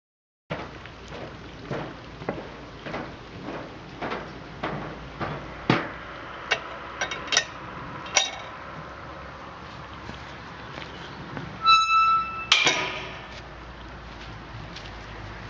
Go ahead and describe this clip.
Gate closing, walk towards me
Someone walking towards me on a bridge over a small stream, opening a squeaky metal cattle gate and letting it fall shut. The brook can be heard in the background. Unedited field recording with a Panasonic Lumix camera.